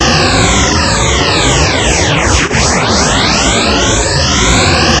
Energy sound created with coagula using original bitmap image of myself.
laser, ambient, space